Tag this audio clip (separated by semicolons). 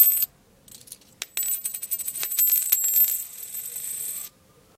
coin; dropping; fall